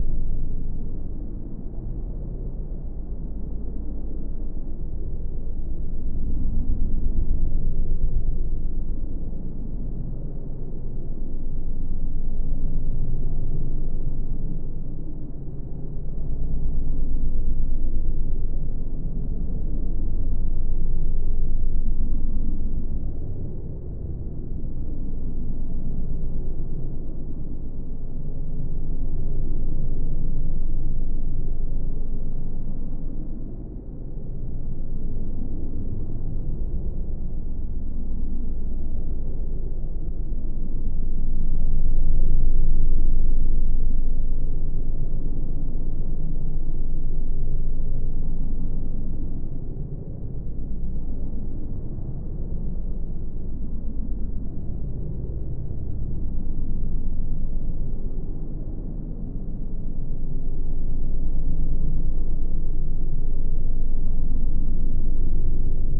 An ambient sound from the Sokobanned project.
tangostudio, sound, tango, zynaddsubfx, ladspa, studio, jack-rack, linux, ambient